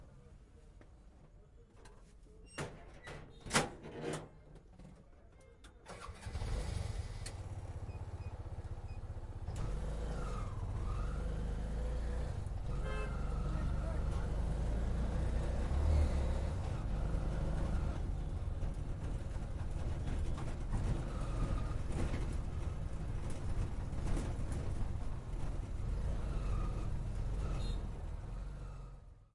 3Wheeler Starts
3 Wheeler auto rickshaw starts.
3, Auto, ignition, reckshaw, SFX, Wheeler